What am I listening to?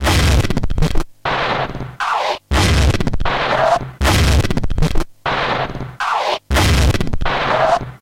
beat pleura fx
a strange beat created by samplers